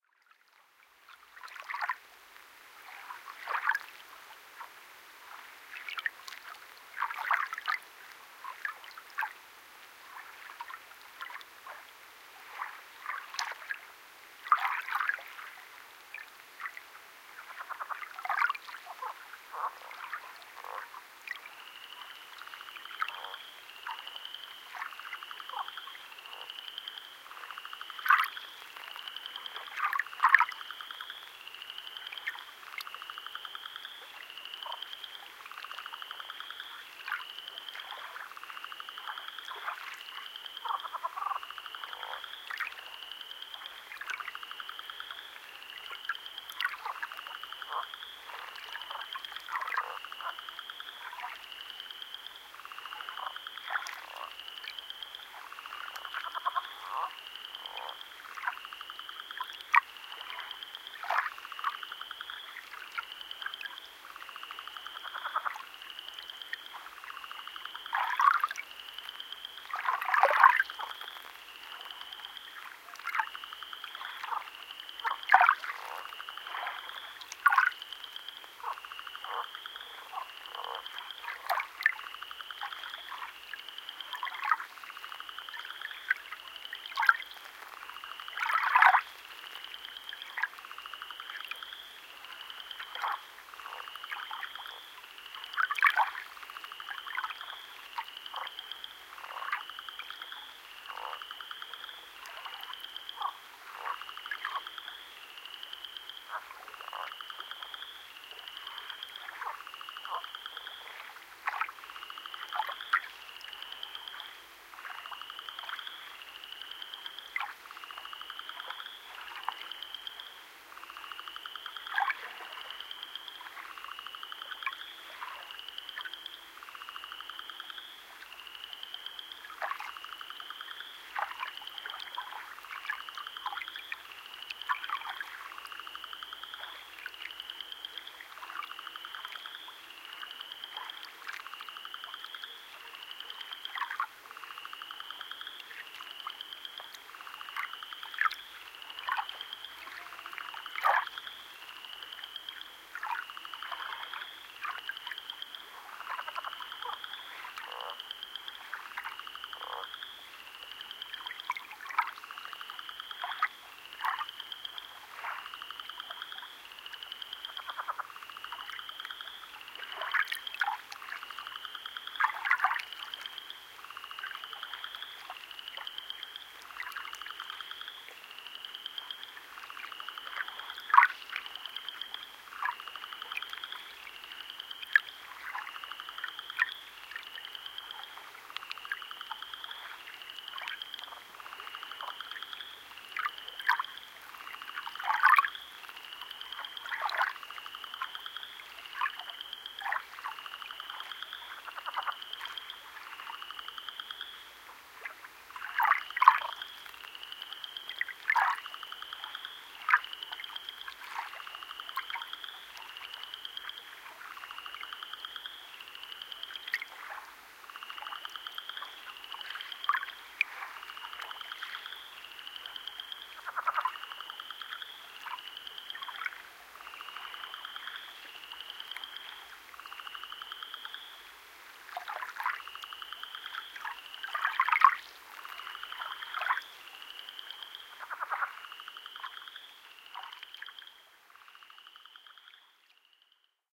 Gentle waves, frogs (Spring Peepers), and a dog - recorded on Friday, March 19, 2010 around 9 PM in the Black Forest, Texas area of Lake Sam Rayburn. Winds were maybe 1-4 mph. Temps about 55 degrees. Recorded on a Marantz PMD661 recorder with Super Mod from Oade Brothers (used manual recording level at maximum level (goes from 1 to 10, so turned up all the way to 10); also, used a Sony ECM MS-957 stereo mic, Rode mic cable with stereo XLR connections, mic stand, and comfortable camping chair. Had to shelve down boat noises (0-600 Hz range) around 45-60 dB's with about a 6.0 Q (width). Dramatic shelving was used because fishing tournament was going on plus Spring Break - lots of boats! Slight compression on frogs singing because they were beginning to mask the extremely quiet waves at times. -6dB tilt from 600 Hz upwards. Only 2.8 dB of limiting on one peak when brought volume up - very little increase used in order to preserve dynamics.